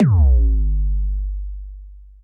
Sound of a sci-fi plasma gun/cannon made by layering several bass-heavy sounds and pitch-shifting them together with applying noise and some reverb.
electric, future, soundeffect, cannon, laser, plasmagun, space, sfx, scifi, gun, sci-fi